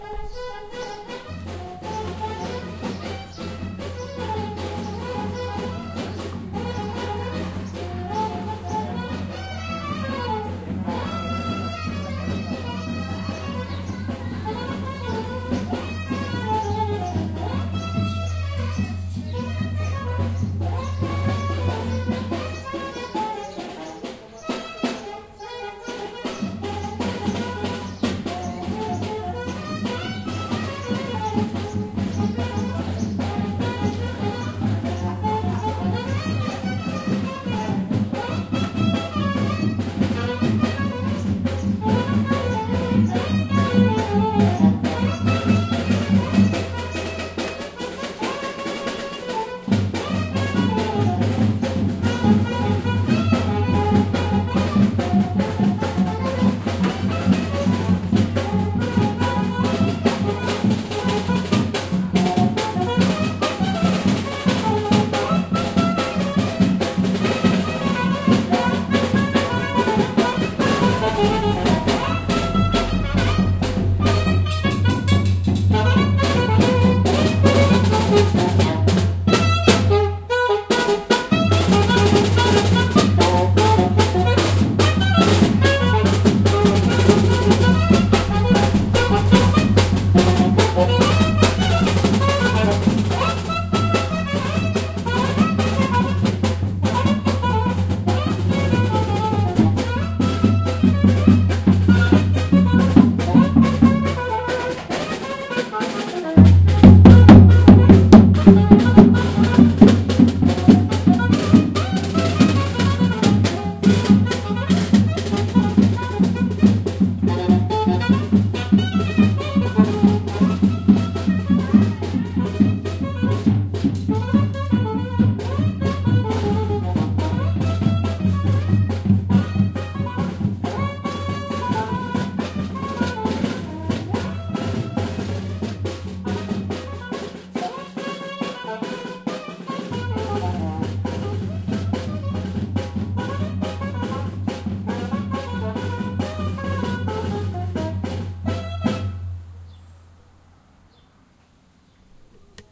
Folk Music Family Band playing on street in Hermosillo